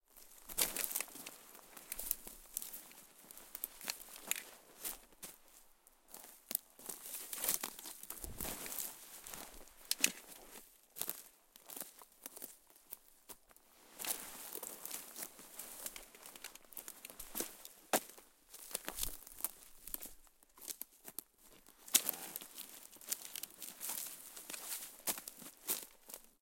pine-forest--ww2-soldier--impacts--branches
Soldier in World War II gear moving in a Finnish pine forest. Summer.
branches, field-recording, foley, forest, grass, metal, rustle, soldier